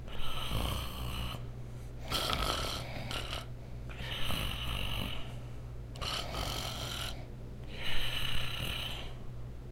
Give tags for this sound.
humam
sleep
snore

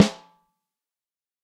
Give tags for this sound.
snare
14x5
multi
drum
mapex
velocity
electrovoice
sample
pro-m
nd868

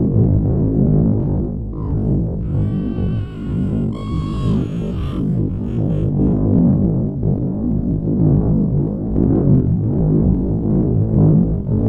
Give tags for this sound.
creature
voice
robotic
eerie

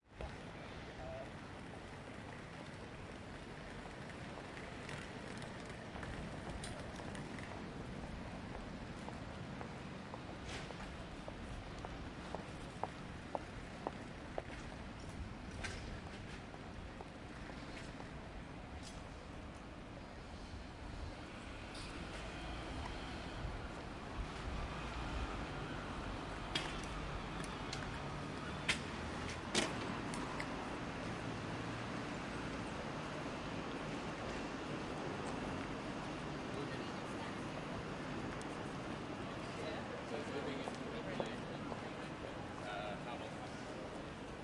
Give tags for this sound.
crowd
entrance
St-Pancras
traffic-road
train-station
walking

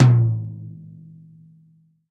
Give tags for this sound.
Bosphorus
Cooper
Istambul
TRX
bronze
bubinga
click
crash
custom
cymbal
cymbals
drum
drumset
hi-hat
hit
metal
metronome
one
one-shot
ride
shot
snare
wenge
wood

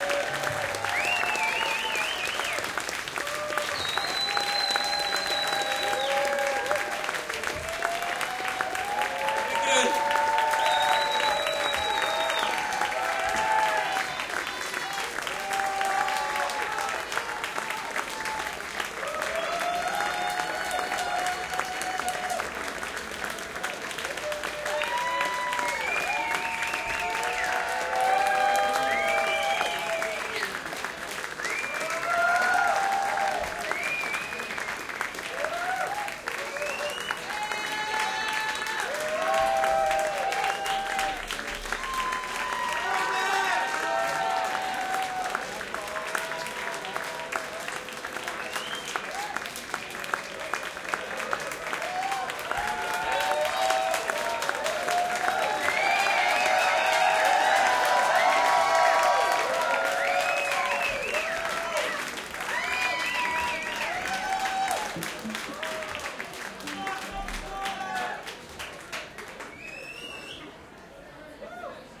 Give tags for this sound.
people
yelling
interior
cheering
talking
encore
crowd
inside
clapping

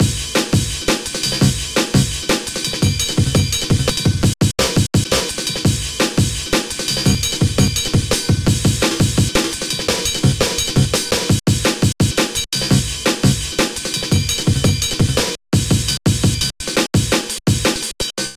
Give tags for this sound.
bass
beat
beats
break
breakbeat
breakbeats
breaks
drum
drum-loop
drumloop
drumloops
drums
jungle
loop
loops

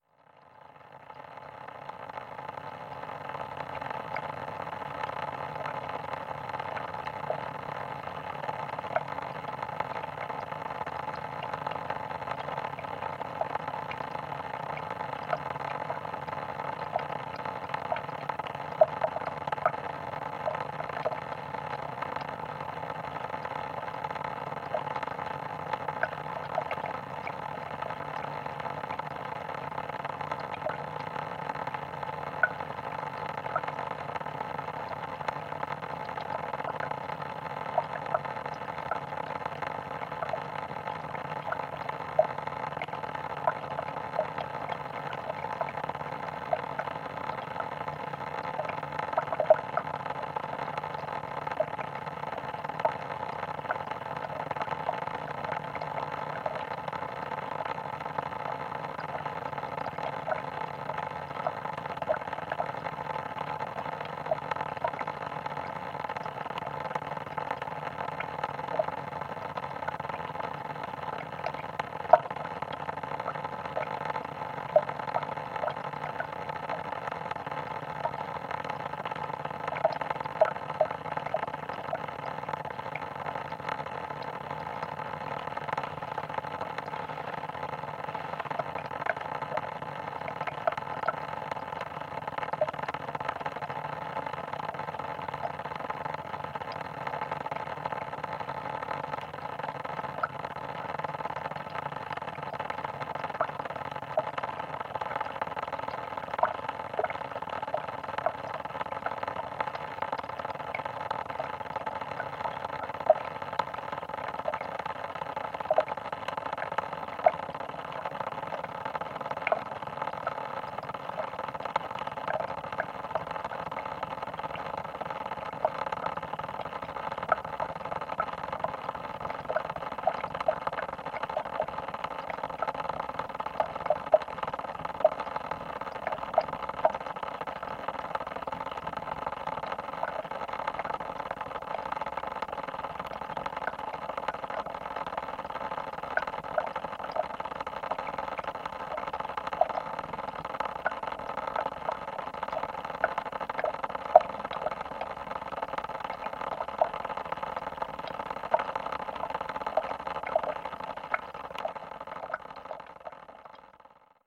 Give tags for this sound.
water
contact-mic
faucet

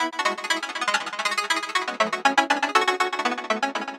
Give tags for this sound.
loop
synth

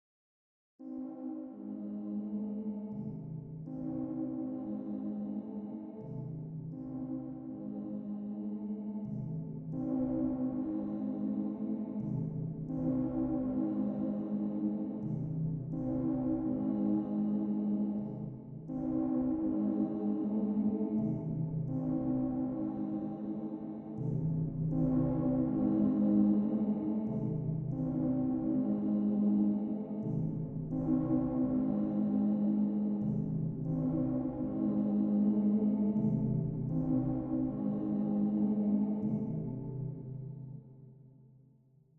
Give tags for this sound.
Eerie
Atmosphere
Sound-design

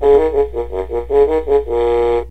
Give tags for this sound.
loops
toy

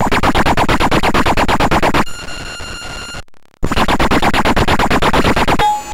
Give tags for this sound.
bending
circuit-bent
coleco
core
experimental
glitch
just-plain-mental
murderbreak
rythmic-distortion

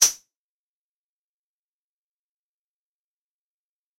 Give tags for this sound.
drum
electronic

